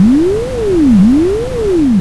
bonnard elsa 2012 13 son3
//////// Made using Audacity (only):
Generate
- sound : sifflet
Sinusoïde
Frequence 1320 – 440
Amplitude 0, 1 - 0,8
- Sound: sifflet
Sinusoïde
Frequence 440 – 1320
Amplitude : 0, 8 – 0,1
Vitesse changed : 157 – 60
Duplicate the two sound
Create a second mono tracks
Generate Red Nois : 0,3
then mix them all together on one track
Reduction of sound level for a fade out (manual)
Normaliser : -0,3db
//////// Typologie
////// Morphologie: X continue complexe
- Masse: son seul complexe
Timbre doux futuriste et parasitaire (mixé avec bruit)
- Grain: rugeux et constant
- Allure: stable pas de vibrato légère ondulation
- Attaque: douce et constante
- profil mélodique: petite variation glissante
- profil de masse / Calibre : son couplé à du bruit
alien, audacity, Fi, noise, scie, sifflet